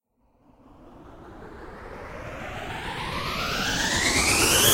Engine start 01
electric-engine, electronic, house, machine, technical-sound, vacuum-cleaner